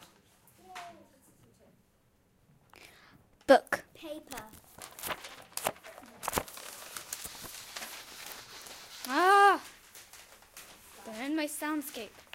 sonicsnaps GemsEtoy eloisebook
Etoy, sonicsnaps, TCR